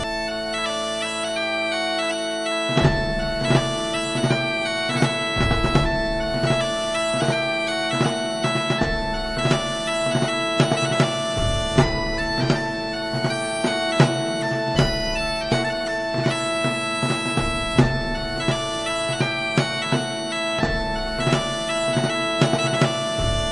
bagpipe-victory
Pipe and drums combo on synth